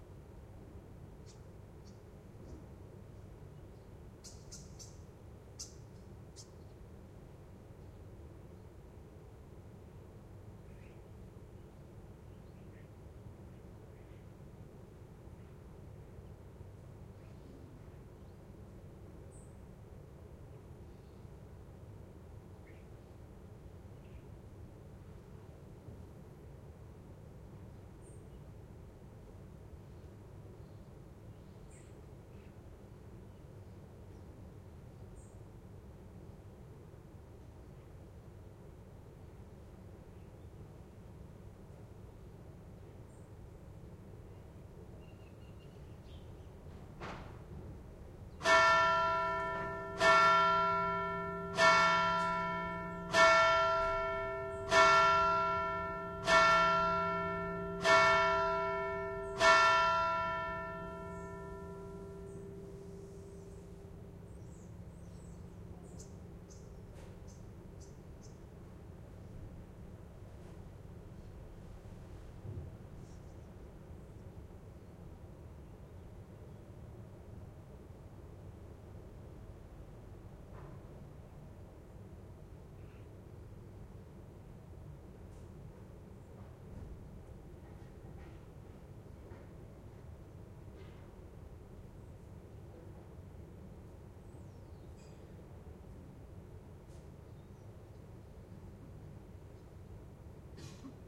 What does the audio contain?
111013 - Sirmione - Kirchenuhr Mit Umgebung
field recording with surrounding of the church in Sirmione and the church bell ringing in between.
bell, church, field-recording